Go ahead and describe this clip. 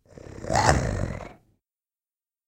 A noise I made for a project. Altered the pitch a little and added a touch of reverb and bass. Thought I'd share it.
animal, beast, creature, dog, growl, growling, monster, roar, snarl, werewolf, wolf